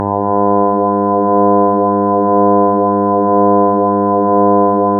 Harmonic(101-1010) withEffects
experimental, sound-art